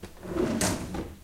Sliding the cutlery drawer closed